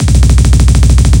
A simple Trancy Drumroll, use with the other in my "Misc Beat Pack" in order from one to eleven to create a speeding up drumroll for intros.
Drumroll, Psytrance, Trance